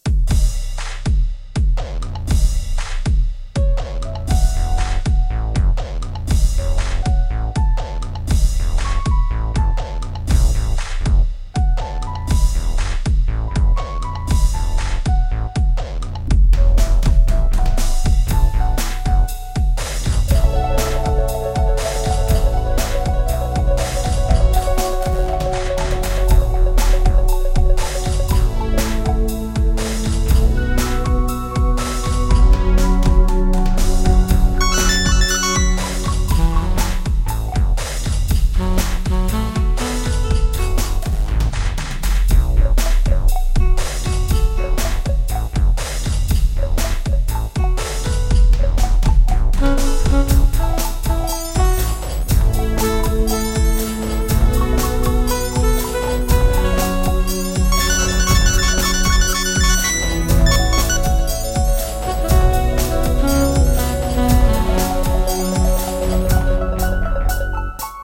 DaHooda 120 BPM
A short clip from one of my Original Compositions.
Equipment used: Audacity, Yamaha Synthesizer, Zoom R8 Portable Studio, Hydrogen and my gronked up brain.
Audio, Beats, Blues, Clips, Country, Dub, Dubstep, EDM, Electro, Guitar, Hip, Hop, House, Jam, Keyboards, Music, Original, Rap, Rock, Synth, Techno, Traxis